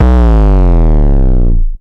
Synthesiser bass one shot!